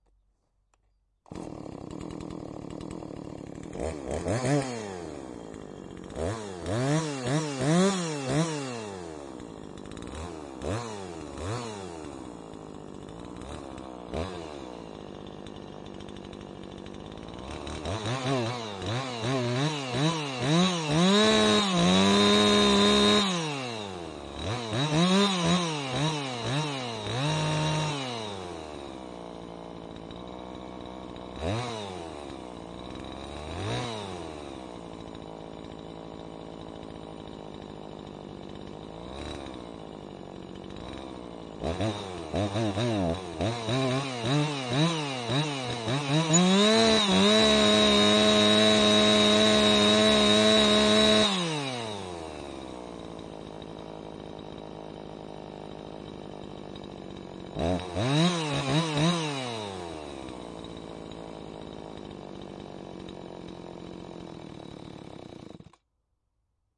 Starting a two-stroke chainsaw and reving it, then killing it.
Always fun to hear where my recordings end up :)
field-recording, wood, engine, forest, saw, chainsaw, lumberjack, petrol, motor, two-stroke, tree, lumber, woods, roar